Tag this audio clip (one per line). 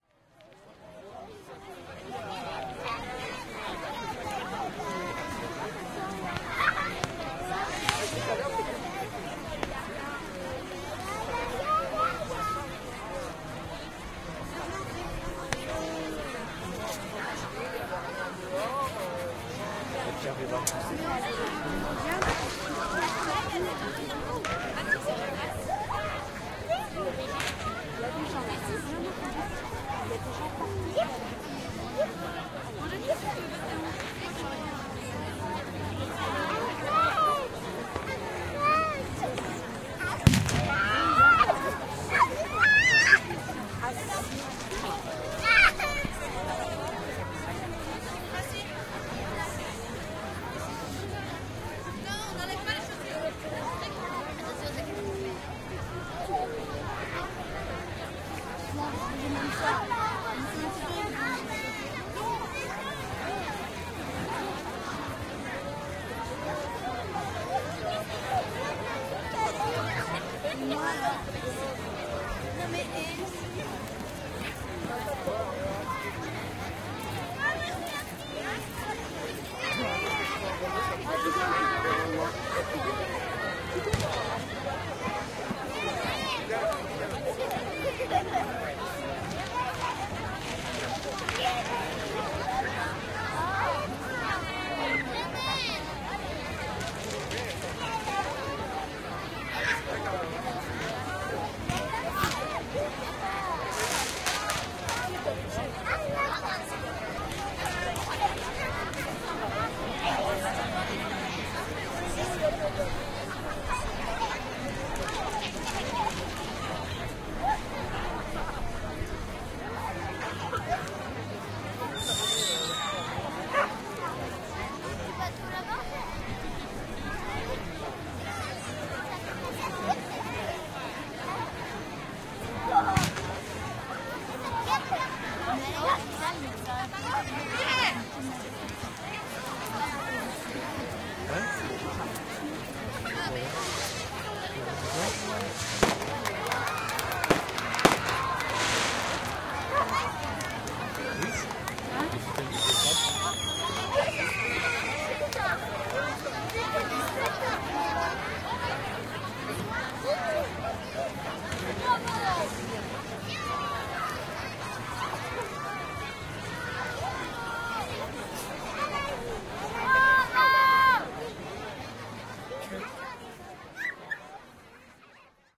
beach
Brittany
crowd
field-recording
France
gathering
party
people
voices